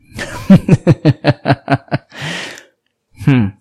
Male laughing.
Ponce, Puerto Rico; Daniel Alvarez.